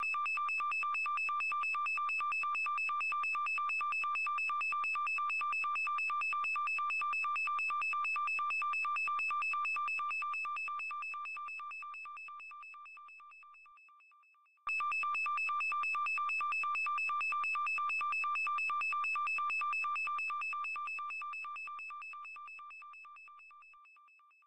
Space Beacon 1
A collection of Science Fiction sounds that reflect some of the common areas and periods of the genre. I hope you like these as much as I enjoyed experimenting with them.
Machines, Futuristic, Sci-fi, Alien, Electronic, Spacecraft, Noise, Space, Mechanical